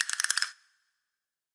Tight castanet roll with slight reverb. BPM of the sample is 120.